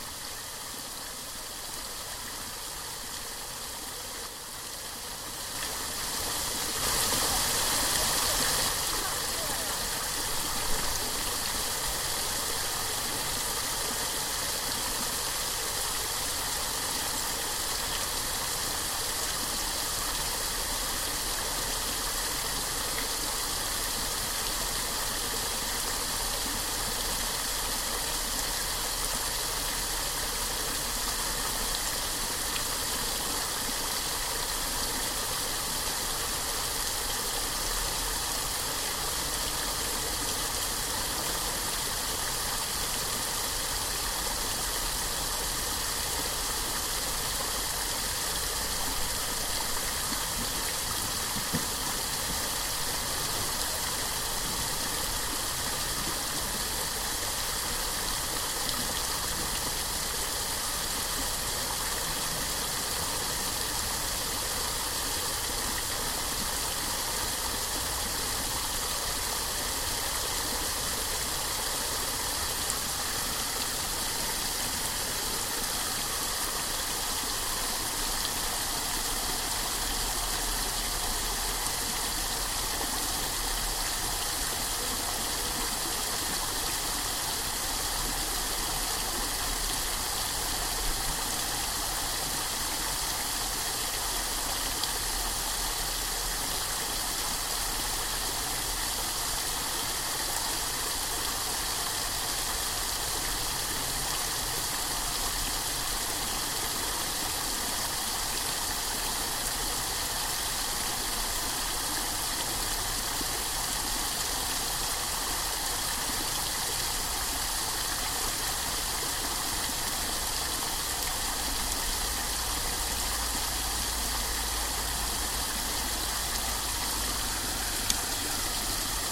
Cascading Water #1
Water Feature at Uni
field-recording, fountain, water